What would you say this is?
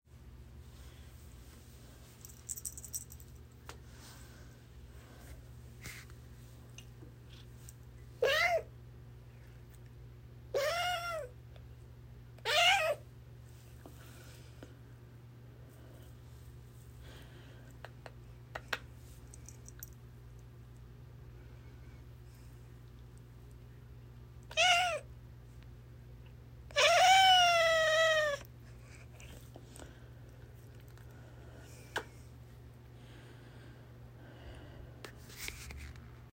Cat Meowing
My adult cat was really wanting to be in on the recording, and got a little angry that I wouldn't hold him. You also get a brief jingle of his collar bell and loud exhalations of my breathing - I was trying not to laugh at his antics. I was holding my breath very close to the mic.